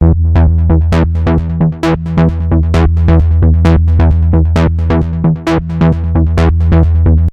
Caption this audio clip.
moving bass recorded from freeware synth automat